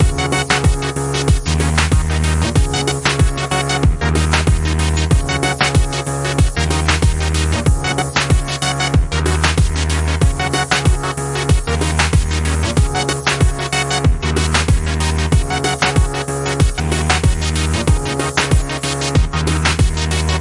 Techno mix for a pre-action scene